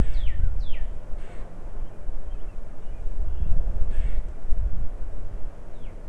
this is a live mic recording in the backyard of my house
ambient, birds, mic, nature, recording